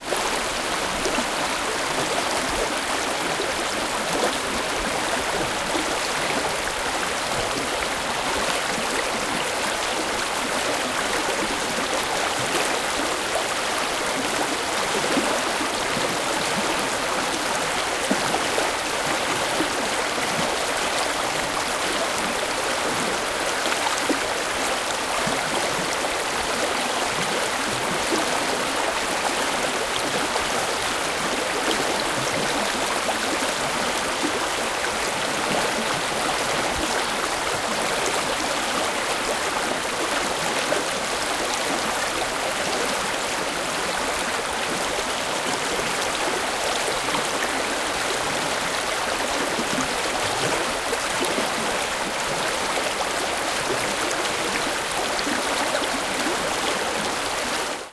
River rapid Camp Blommaberg 2
Recording of a small rapid in the river Voxnan in Sweden.
Equipment used: Zoom H4, internal mice.
Date: 14/08/2015
Location: Camp Blommaberg, Loan, Sweden